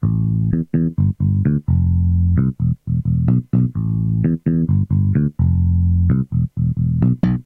Simple Bass guitar riff.
bass, guitar, riff